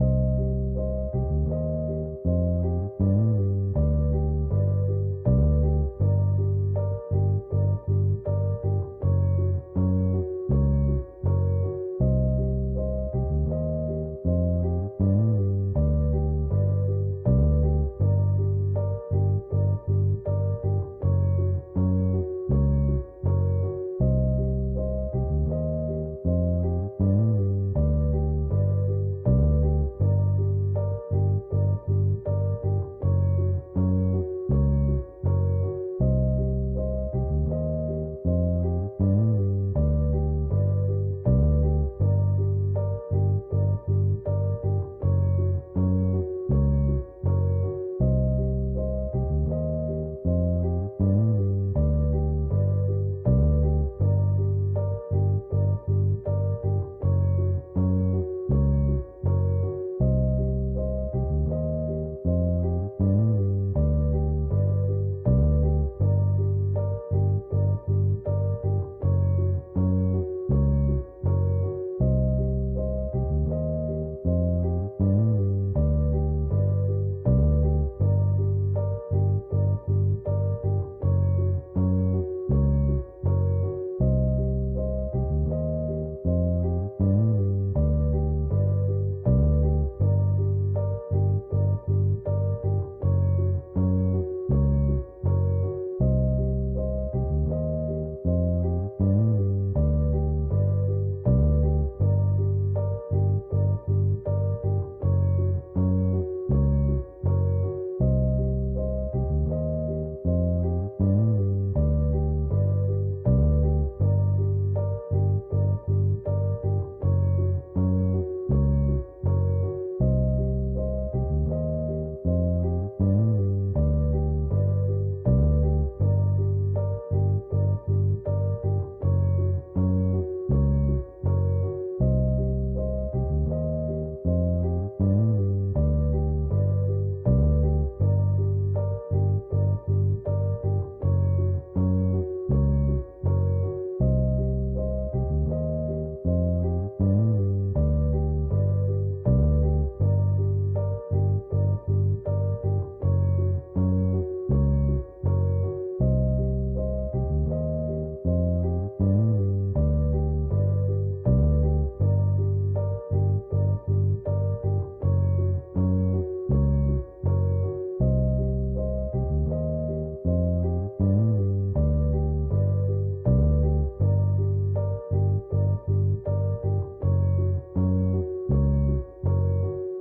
Dark loops 012 simple mix 80 bpm version 1
bass, loop, 80bpm, bpm, 80, piano, loops, dark